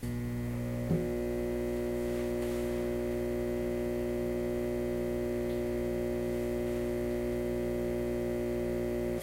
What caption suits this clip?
Older style Fluorescent Lamp with an inductive ballast in my workshop starting up at about 0°C
Recorded on a Zoom H4n using built-in mics and amplified in Audition by +15dB
You can easily decrease the volume if you want to just use it for ambiance.